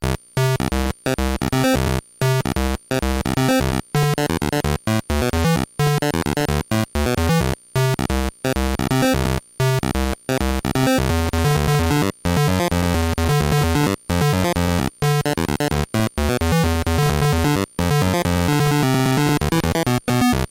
Warndo Equals Love Pattern 1
8bit, cheap, chiptunes, drumloops, gameboy, glitch, nanoloop, videogame